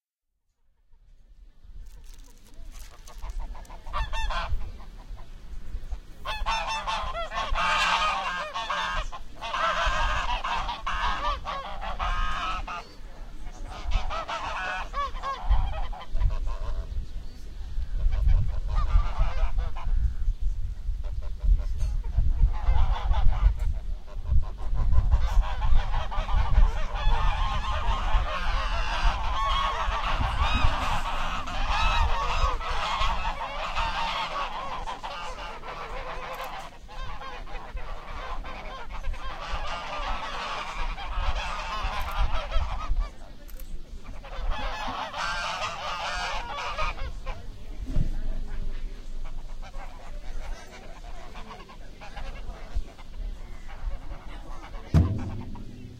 Geese call for food. Greek elderlies' thermal sPA, near the Volvi lake.
Device: ZOOM H2 Recorder.